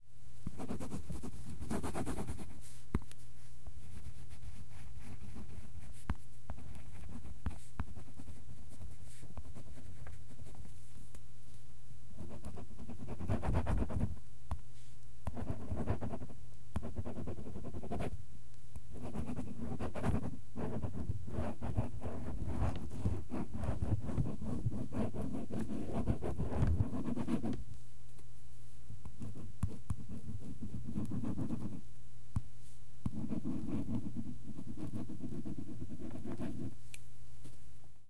Someone writing or drawing with a pencil.